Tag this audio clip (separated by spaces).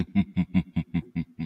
Creepy-Laugh Deep Happy-Laugh Laugh Laughing Voice Wheeze